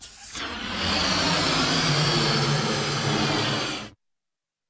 Balloon-Inflate-10
Balloon inflating. Recorded with Zoom H4
balloon, inflate